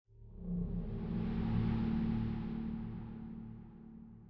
Low toned hollow sound similar to a car driving by.
deep, psy, dark, low, horror, drive